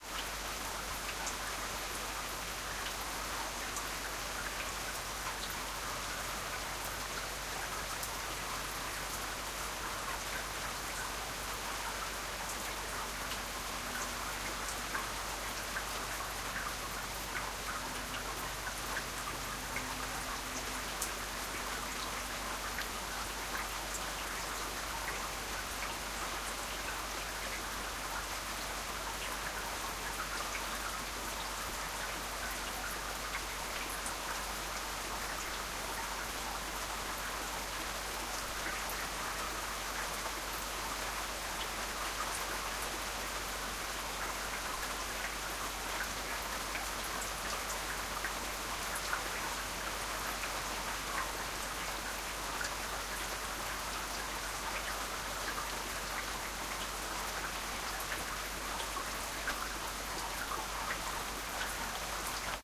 Summer rain recorded in July, Norway. Tascam DR-100.
drip, drop, field-recording, rain, weather